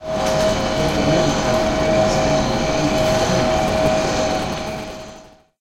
Sound produced when deploying a projector screen
This sound was recorded at the Campus of Poblenou of the Pompeu Fabra University, in the area of Tallers in the Classroom number 54.030. It was recorded between 14:00-14:20 with a Zoom H2 recorder. The sound consist in a noisy tonal signal of the screen mechanism being deployed. Due tp a failure in the recording, some electromagnetic interference were captured.
campus-upf, classroom, deploying, projector, screen, UPF-CS12